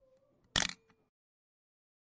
film camera shutter release
short audio file of shutter being released in a pentax spotmatic film camera
focus, photo